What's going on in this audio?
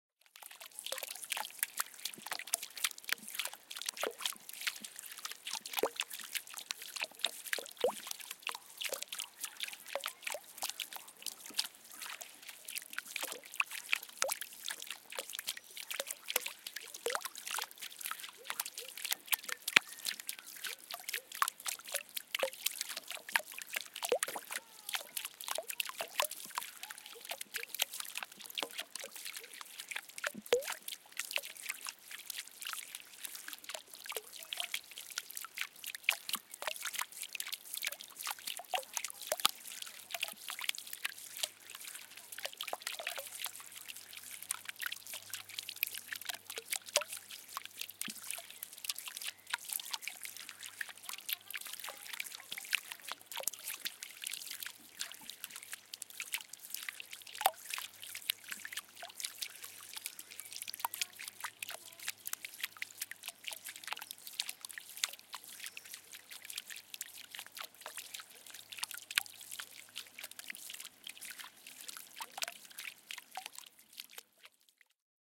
Irregular Dropping Water
Single small fountain recorded with a Zoom H4
creek, field-recording, flow, flowing, gurgle, gurgling, nature, relaxation, relaxing, splash, stream, trickle, waves